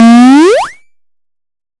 Attack Zound-27
An electronic sound effect consisting of a pitch bend in upward direction. This sound was created using the Waldorf Attack VSTi within Cubase SX.
electronic, soundeffect